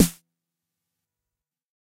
various hits 1 114
Snares from a Jomox Xbase09 recorded with a Millenia STT1
909, drum, jomox, snare, xbase09